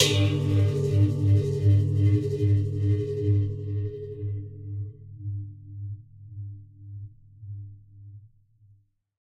A large metal pot suspended on a rubber band. The pot is hit while spinning.
sound-design, spin, spinning, resonant, pot, metal, hit, sound, metallic, wah, pan